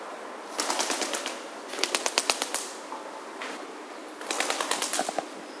pigeon.wings.00
three fluttering of wings of pigeons that pass, some mic noise at the end of the third. Recorded from my balcony with Audiotechnica telemike and iRiver iHP120 (input set at +18dbB). Drop-off filter below 250 Hz / tres aleteos de palomas, con ruido de microfono al final del tercero
field-recording, birds, city